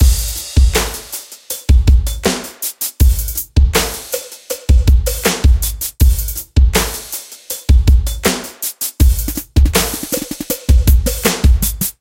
Created in Hydrogen and Korg Microsampler with samples from my personal and original library.Edit on Audacity.
hydrogen, pattern, pack, kick, library, sample, edm, drums, fills, loop, korg, bpm, dance, free, beat, groove